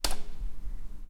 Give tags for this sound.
Police doors lock latch Station London squeal locks scrape Prison Shoreditch

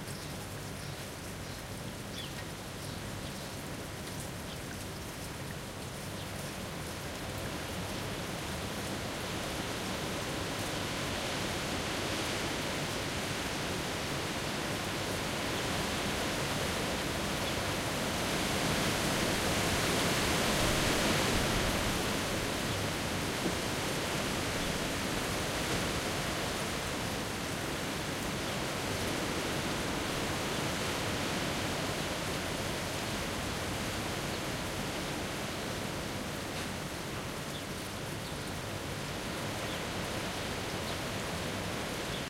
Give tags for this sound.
Nature Rain Storm Thunderstorm Weather Wind

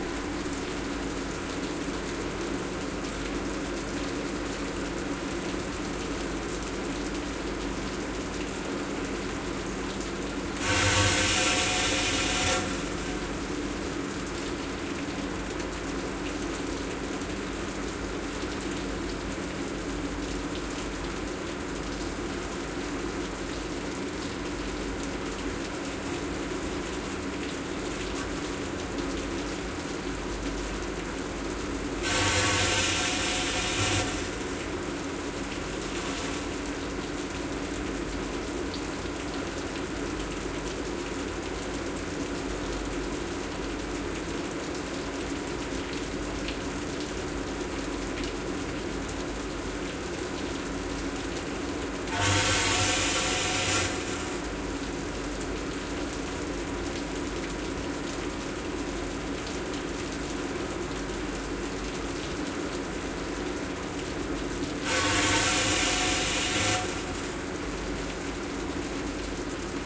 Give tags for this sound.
bath filtration municipal spritz system

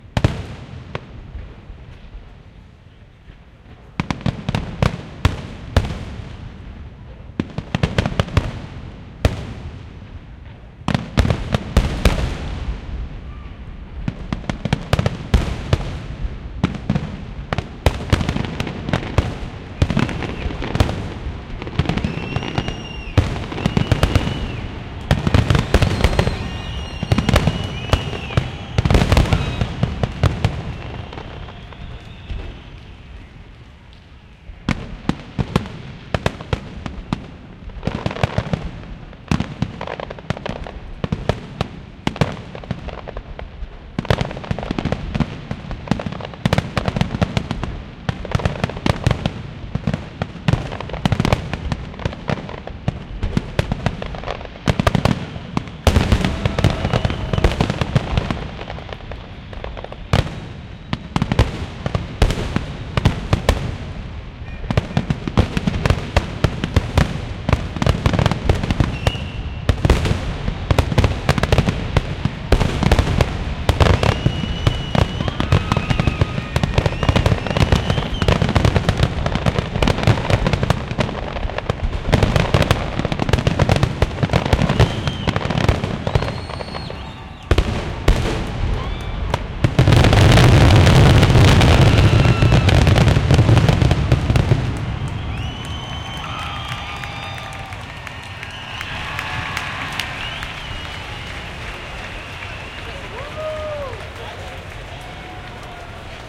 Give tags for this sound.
fireworks Canada climax Montreal

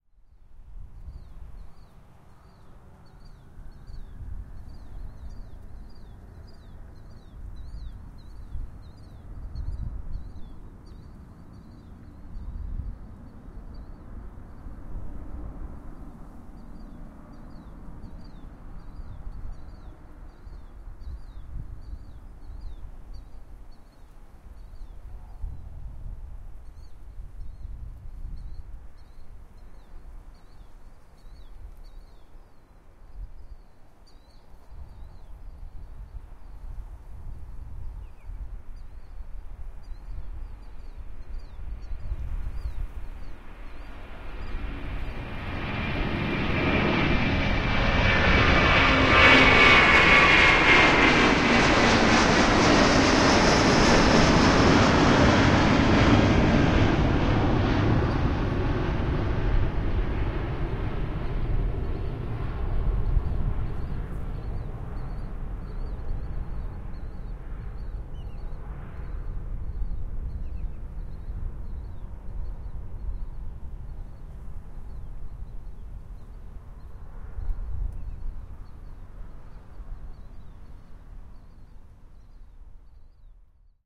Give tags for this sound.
jet a330 flight airbus airplane runway take-off takeoff field-recording

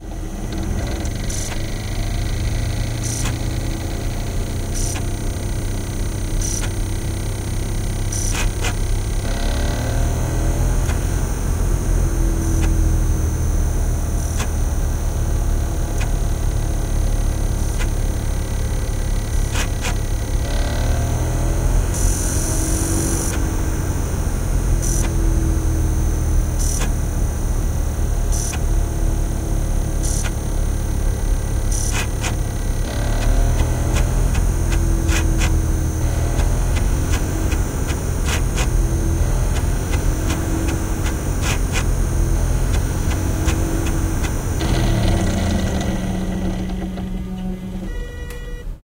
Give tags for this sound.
cd dvd electronic glitch mechanical motor read reading scratch scratched spin spinning unreadable

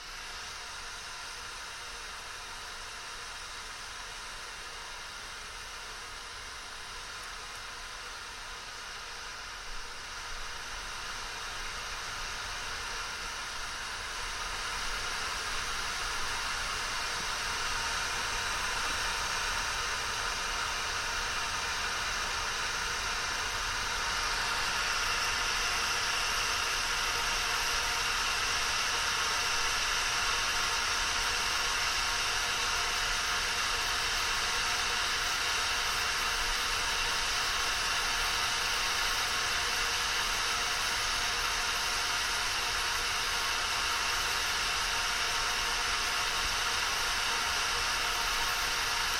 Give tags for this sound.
burning fire gas hot kettle kitchen stove

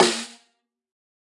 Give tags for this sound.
multisample snare

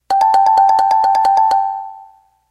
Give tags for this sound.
animation blackout film game movie video video-game

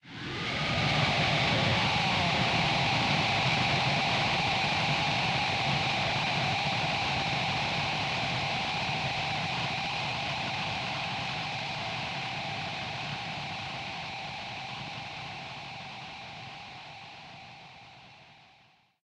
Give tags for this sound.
ra scream shout

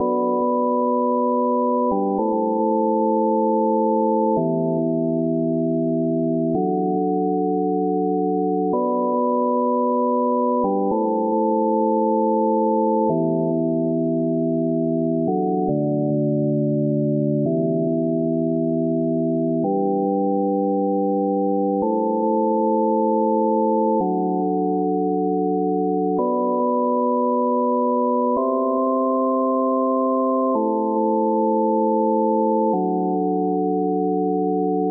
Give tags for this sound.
110bpm E appleloop loop minor organ

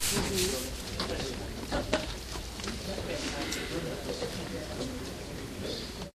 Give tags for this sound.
ambience crowd field-recording people theater walla

